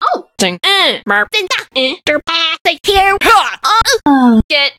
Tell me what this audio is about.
(The Po-33 splits one sound file into a kit of 16 sounds. Hence why a sample pack /kit as a single sound like this is appreciated)
I wanted to do a sort of vocal chop in a melodic way where each sound would fit in accordance to the Po's minor scale. So pad 5 would be a note of "A", pad 6 a "B" etc... So vocals could be played like a piano but having different core sounds/sample.
The kit was supposed to be in Aminor. But this project failed miserably so this is just a random vocal kit :'(
Sounds used in order of play:
Some processing was done to normalise and there may be some autotune on some samples.
Hope you enjoy :)